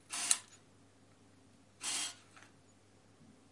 spray cleaner
spray, cleaner